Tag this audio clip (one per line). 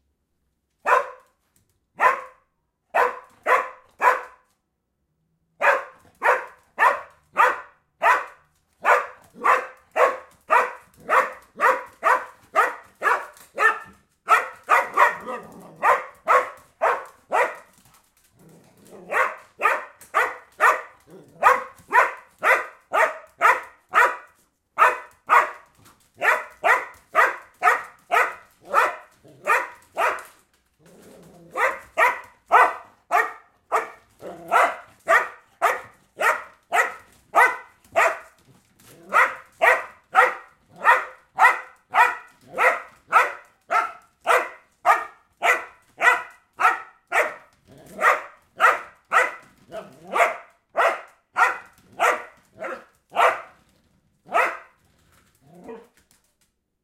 barking
dog